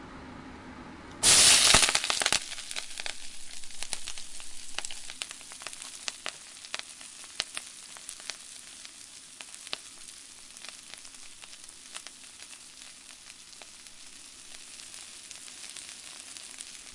Food being thrown into a heated wok